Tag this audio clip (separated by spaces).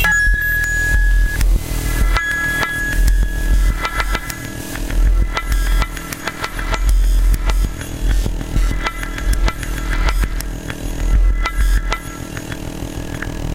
digital-dub experimental